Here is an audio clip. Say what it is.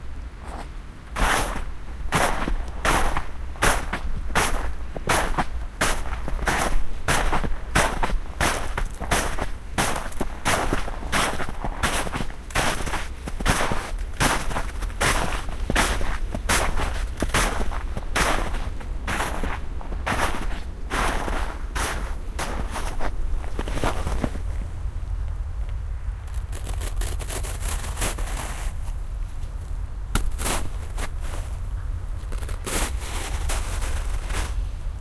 A recording of my footsteps in fresh snow, complete with the subtle crunching sound. Finishes with footsteps on cracking thin ice.